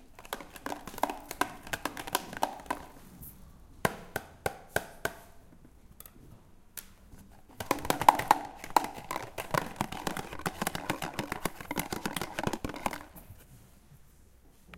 Mysound CEVL Jocio Vitor
Cardboard box with plastic button
TCR, Lamaaes, 2013